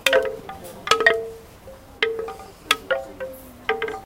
Higher pitched, short sounding wooden wind chimes recorded at a garden centre